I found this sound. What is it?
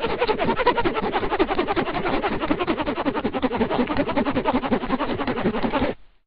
zipper pitch2
moving a zipper up and down really fast (pitch manipulated)
MTC500-M002-s14, manipulation, pitch, zipper